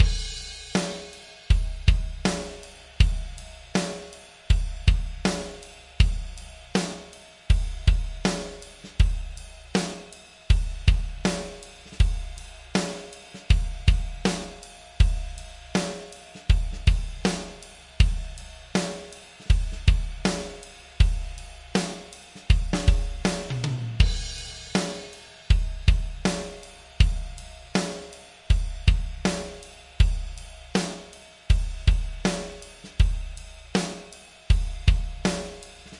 Song3 DRUMS Fa 4:4 80bpms
80, beat, blues, bpm, Chord, Drums, Fa, HearHear, loop, rythm